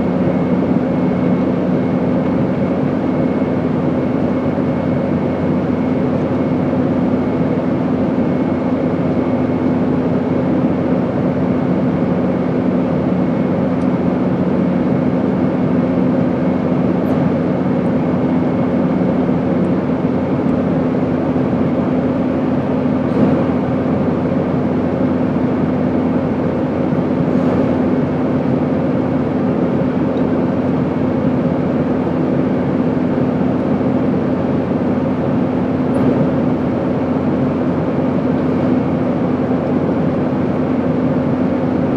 Recorded via laptop mike on an airplane. It's a nice constant rough noise.
airplaine, background, noise